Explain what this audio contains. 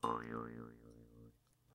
jaw harp11
Jaw harp sound
Recorded using an SM58, Tascam US-1641 and Logic Pro
boing, bounce, doing, funny, harp, jaw, silly, twang